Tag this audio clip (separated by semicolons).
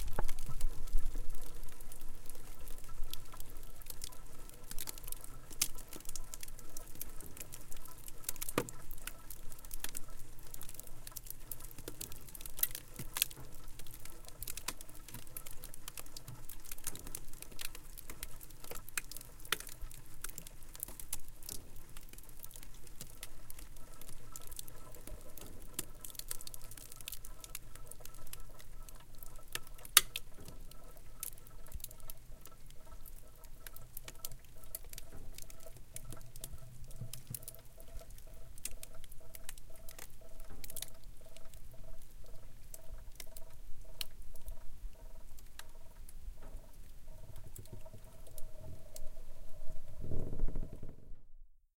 home-recording; ice; crackle; domestic; refridgerator; fridge; appliance